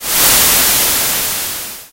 Homemade sound with Audacity simulating sizzling.